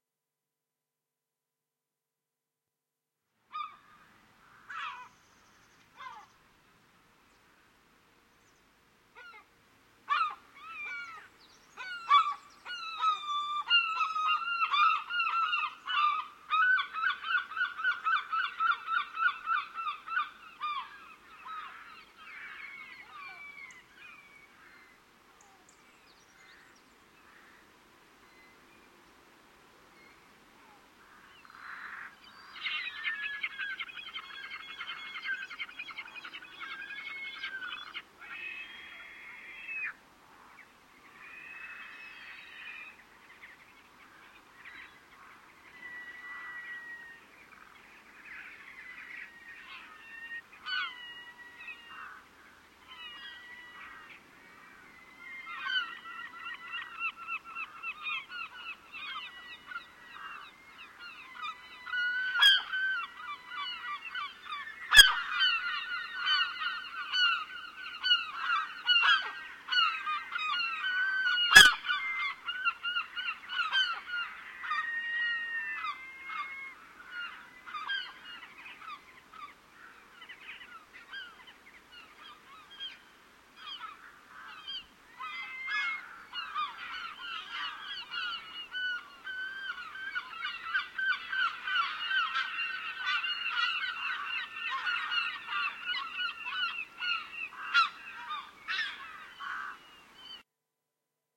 recorded with a TASCAM DR-22WL device on a beach at baltic sea